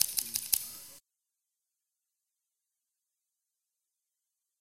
scissors seq rev
scissors' cuts sequence
hi-pitch, scissors